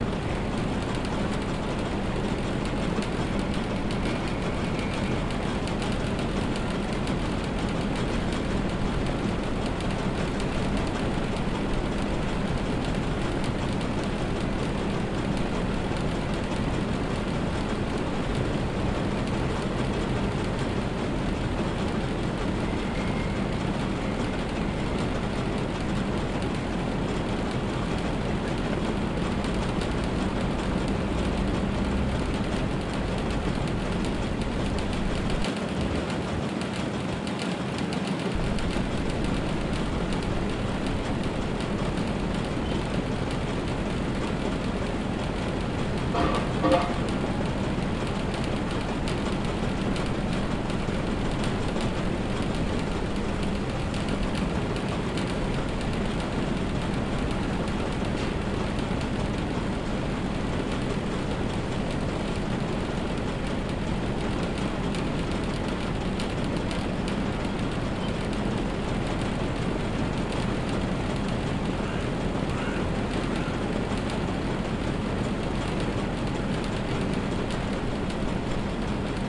air conditionings two items 9
Hum between two air conditioning split-systems (outdoor part).
Recorded 2012-10-13.